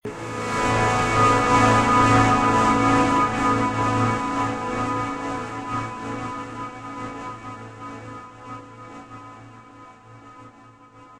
An acoustic guitar chord recorded through a set of guitar plugins for extra FUN!
This one is AM9.